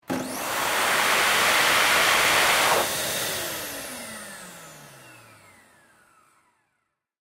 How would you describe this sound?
Hand Dryer 2 (no hand movement)
Recording of a Hand-dryer. Recorded with a Zoom H5. Part of a pack
Bathroom, Dryer, Vacuum, Hand